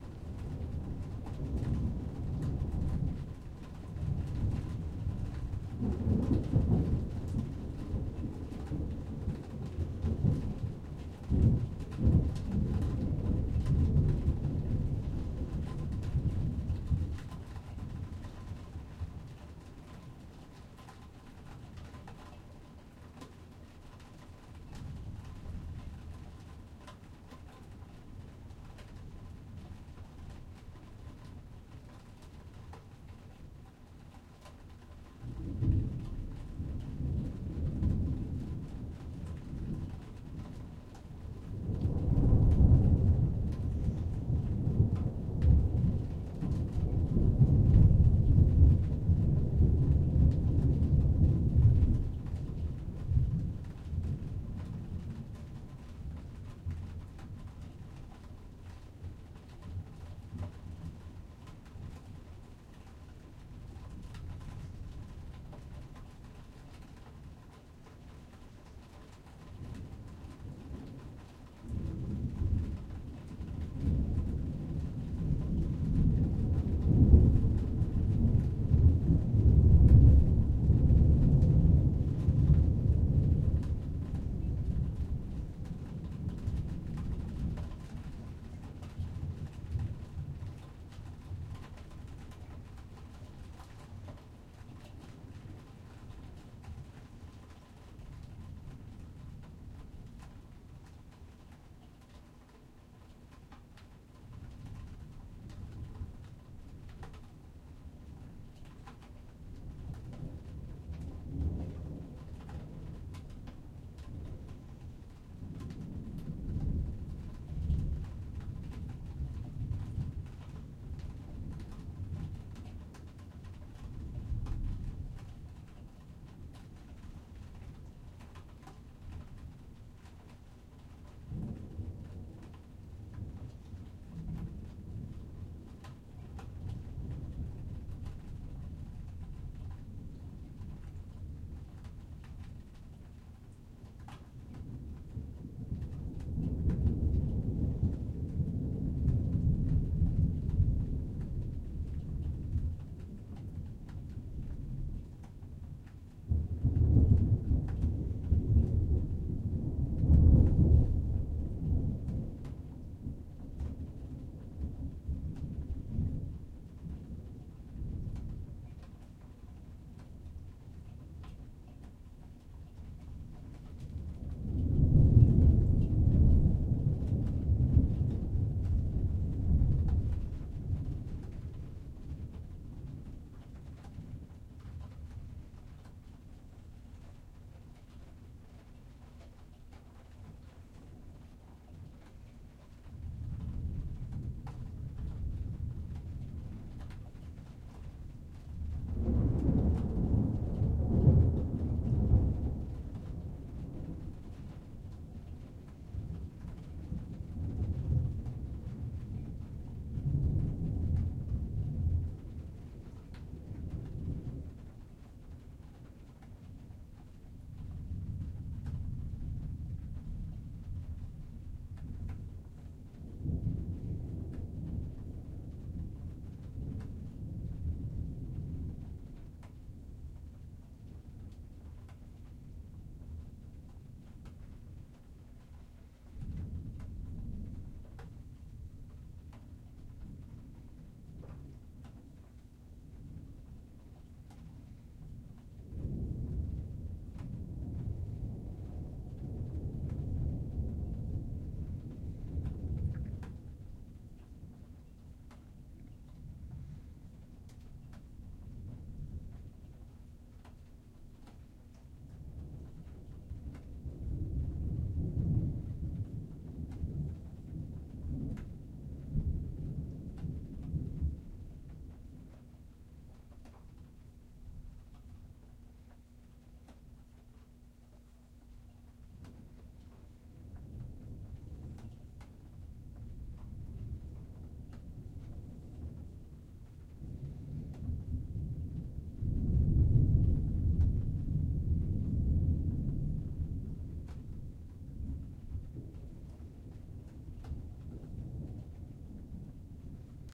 Thunderstorm Indoor
A very energetic thunderstorm recorded from inside a house.
2 Primo EM172 Mic Capsules -> Zoom H1 Recorder
ambient, EM172, field-recording, h1, indoor, primo, rain, room, thunder, zoom